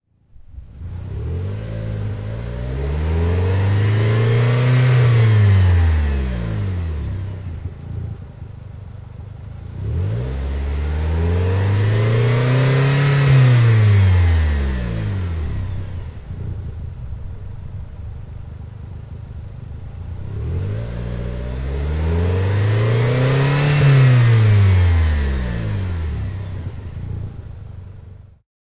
00 Renault duster Exaust 3times outdoor
Sound of Renault Duster drive from 1000rpm to max
engine, drive, car, renault, duster